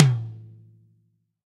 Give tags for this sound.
drum; drums; kit; real; sample; tom; toms

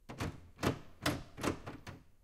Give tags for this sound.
doorknob; handle; jiggle; locked; rattle; shake; test; trapped

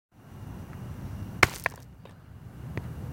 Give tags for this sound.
rock; earth; field-recording

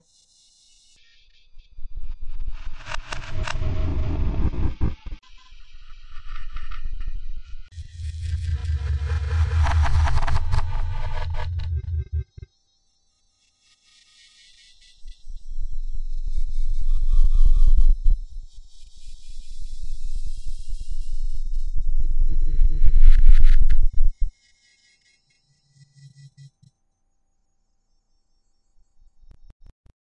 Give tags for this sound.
bug; scary; bass; error; computer; noise; malfunction; spooky; deep; glitch; horror; digital; ghost; strange; sci-fi; rumble; eerie; creepy; abstract; monster; robot; harsh; blip; weird; freaky

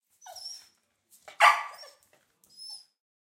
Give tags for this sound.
Czech; CZ

